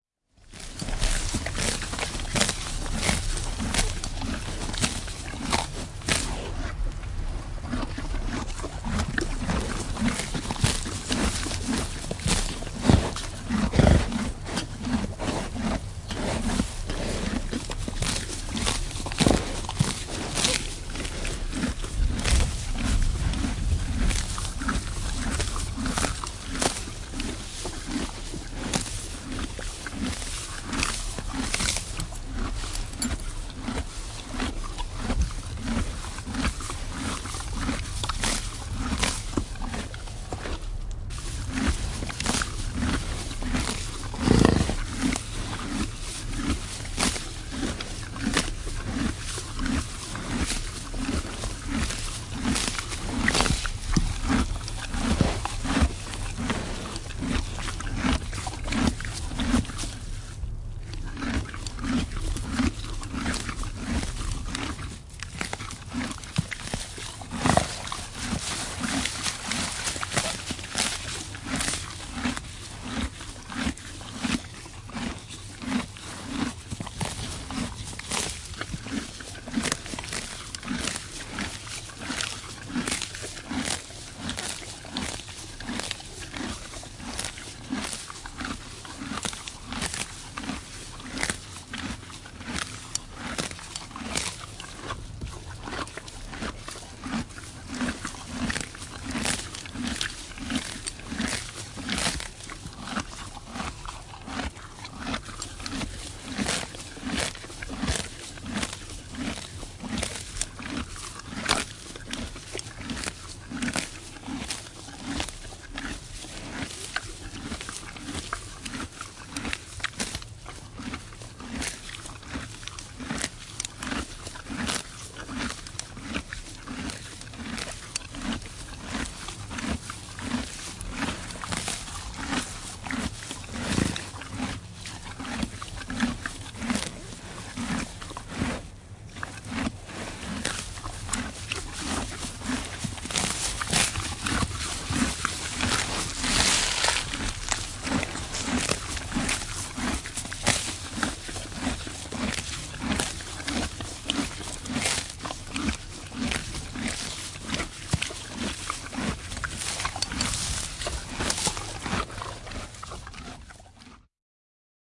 Hevonen syö ruohoa / Horse eating grass in the barnyard, crunching, a close sound, exterior
Hevonen syö ruohoa maalaistalon pihalla, rouskutusta. Lähiääni. Ulko.
Paikka/Place: Suomi / Finland / Vihti, Haapakylä
Aika/Date: 10.11.1982
Soundfx, Rouskutus, Lawn, Domestic-Animals, Tehosteet, Ruoho, Yleisradio, Crunching, Exterior, Barnyard, Piha, Rouskuttaa, Suomi, Finland, Yard, Finnish-Broadcasting-Company, Crunch, Hevonen, Horse, Field-recording, Eat, Yle, Eating